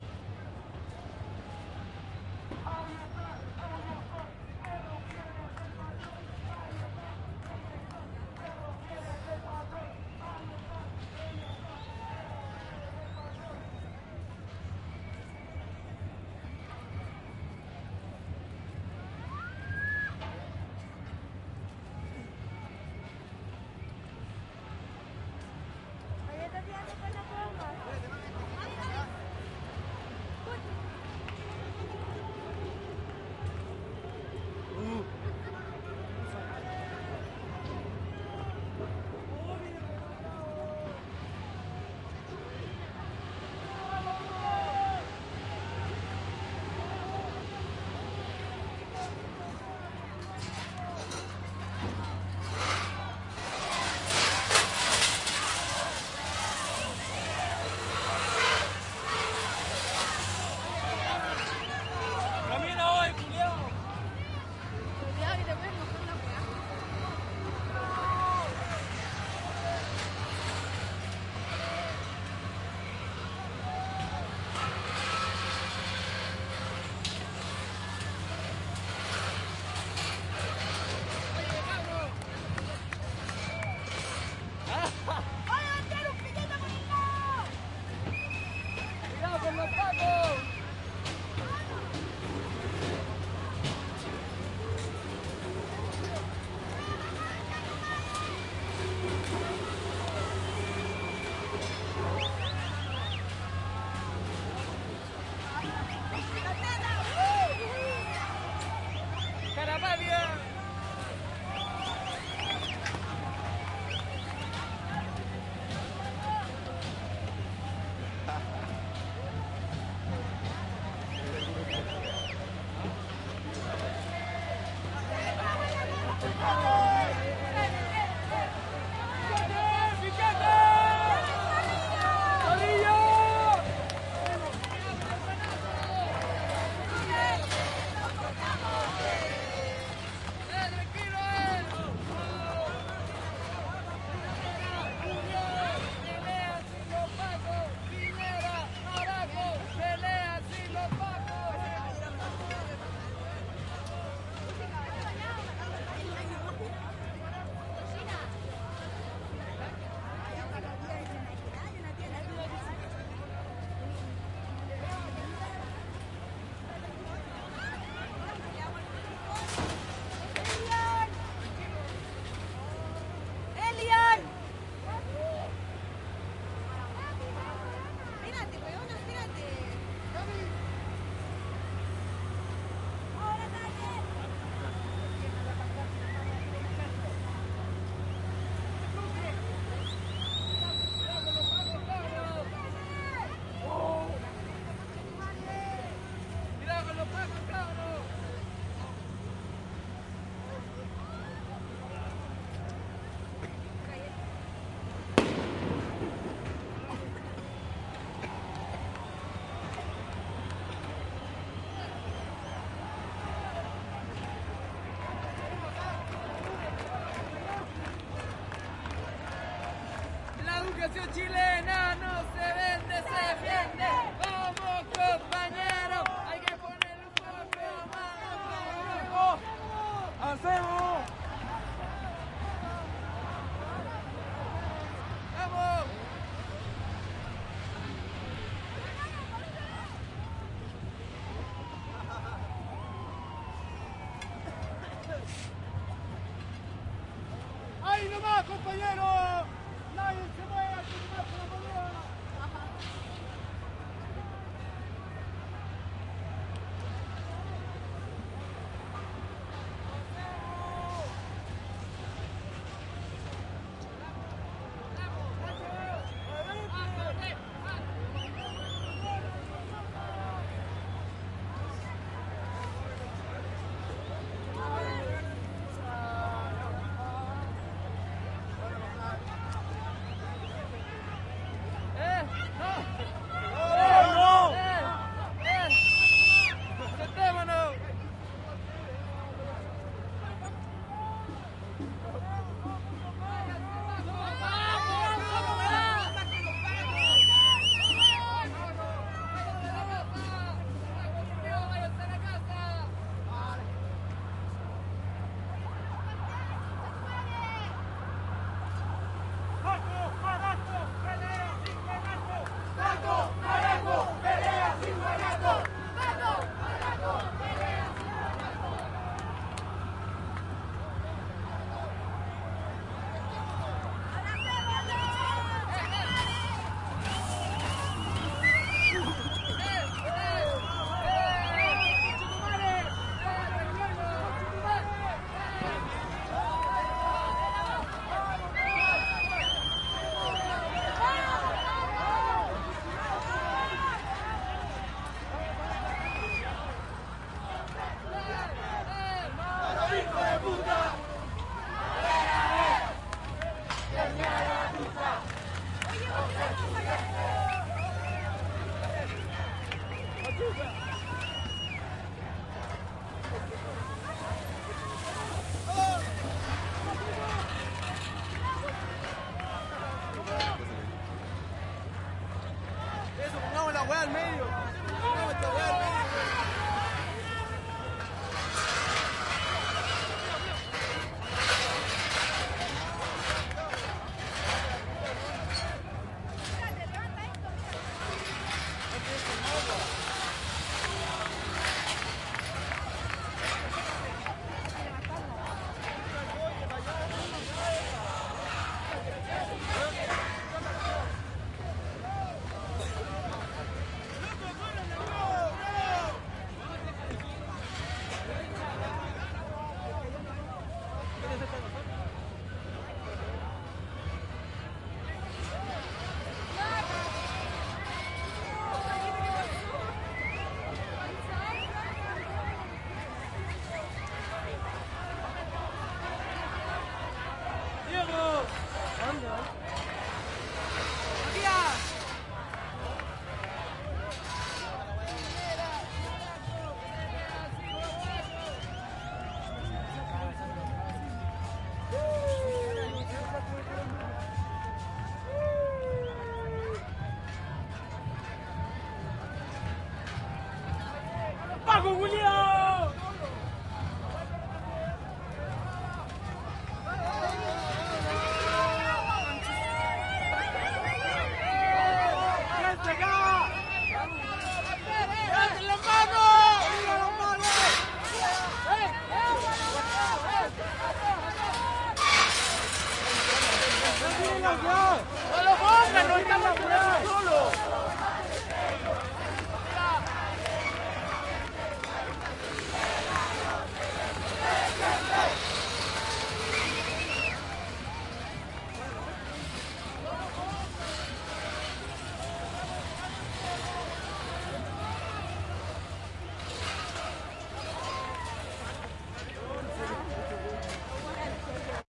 Marcha estudiantil 14 julio - 09 festival de represion 1
comienza el festival de lacrimógenas
ohhh, nos portamos bien!!
la educación chilena no se vende, se defiende
a ver a ver quien lleva la batuta
más barricadas.
Paco, entiende, no somos delincuentes,
el único que roba es el presidente.
marcha, police, nacional, protest, protesta, calle, pacos, people, educacion, policia, exterior, crowd, santiago, chile, paro, street, strike, gente, carabineros